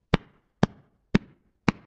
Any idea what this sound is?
Pitch down tempo up claps
clapping, lowered, pitch, sped, tempo, up